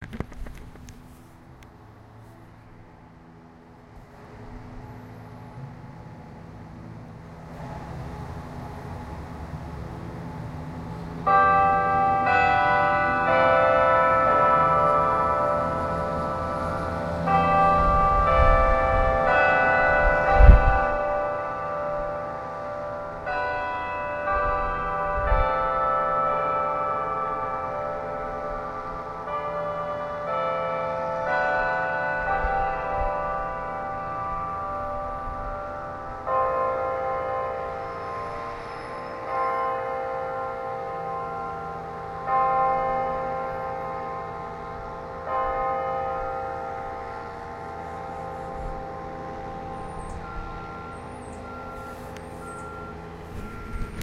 Bell Tower at NC State University
Bell tower chiming.
NC-State, Bells, Chime, Ring, Bell, Time, NCSU, Tower, NC-State-University